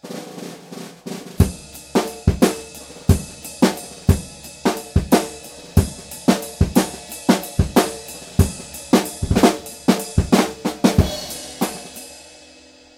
Drums Funk Groove 4 Drumroll Ride
Funky, groovy drum beat I recorded at home. Some drumrolls and ride too.
Recorded with Presonus Firebox & Samson C01.
break, drum, drumbreak, drumroll, drums, funk, funky, groove, groovy, hip, hip-hop, hiphop, hop, loop, rhythm, rnb, roll, soul